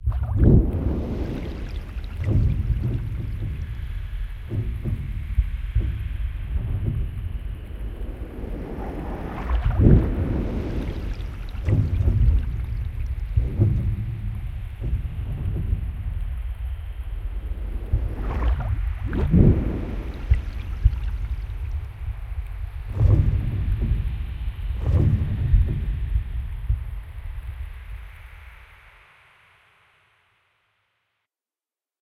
a jellyfish swims in the sea